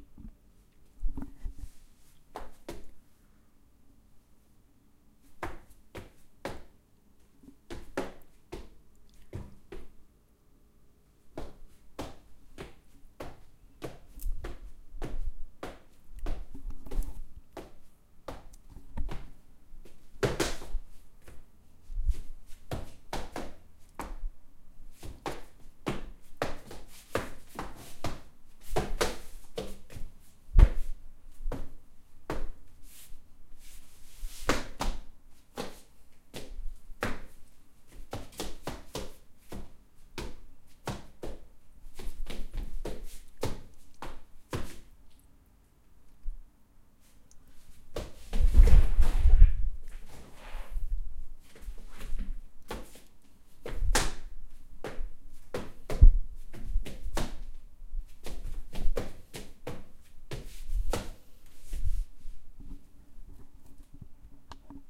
walking with slippers (home)